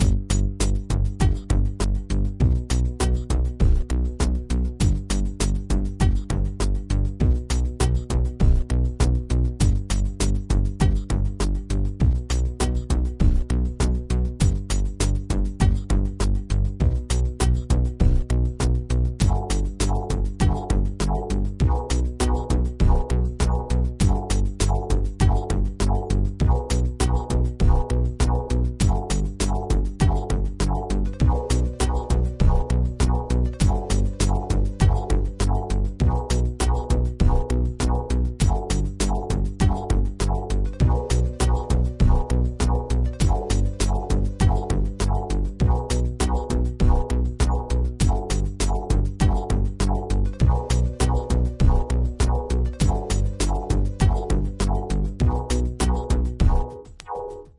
electronic beat
beats, electronic, groovy